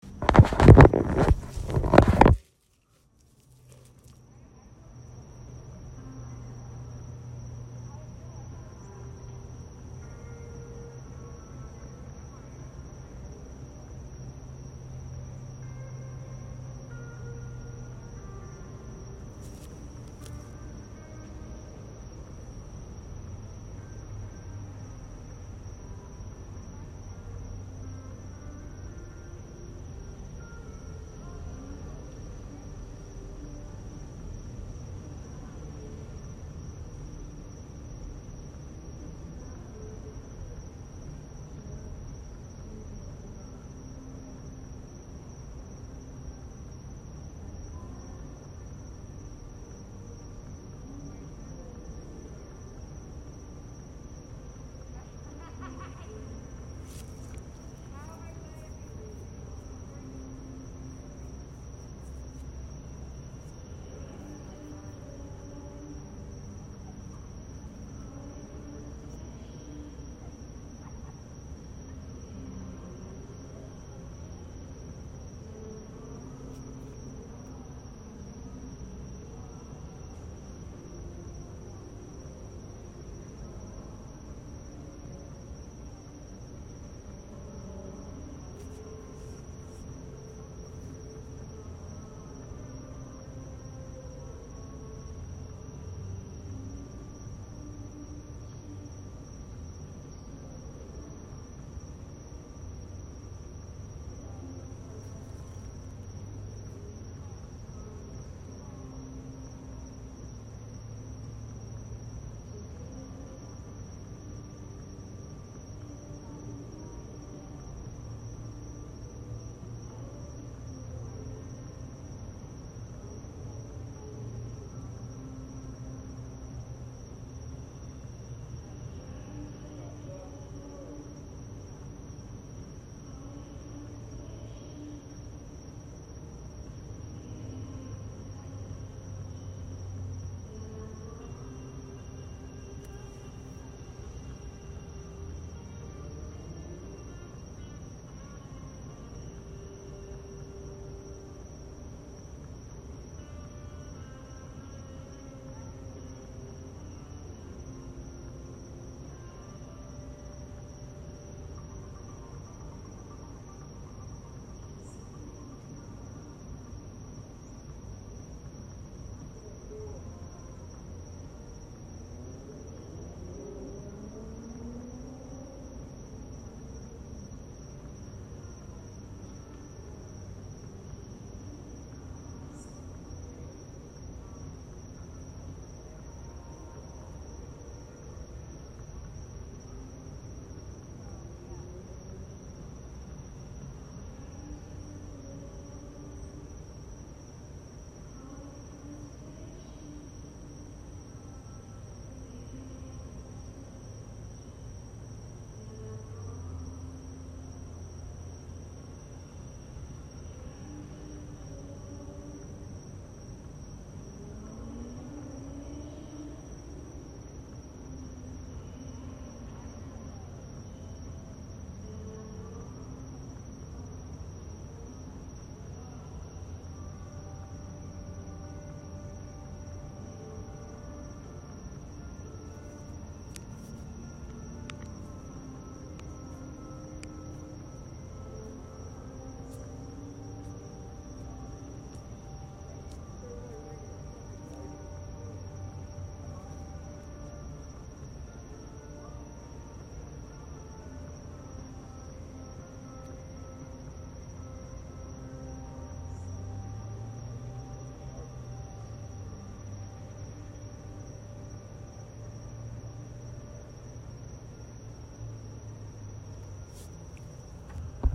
Outside Brewery, Distant Music #2
Distant music plays outside of a brewery as fall turns to summer.
general-noise, atmosphere, background-sound, distant-music, music, night, atmos, background, outside, ambiance, field-recording